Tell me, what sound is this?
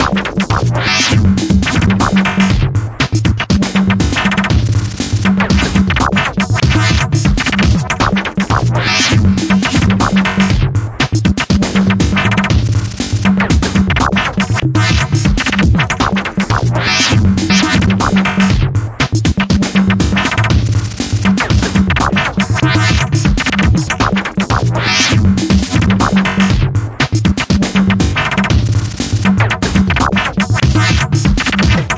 Crazy rhythm loop 120 BPM 008
First rhythmic layer made in Ableton Live.Second rhythmic layer made in Reactor 6,and then processed with glitch effect plugin .
Mixed in Cakewalk by BandLab.
broken, futuristic, glitch, sound, scratch, rhythm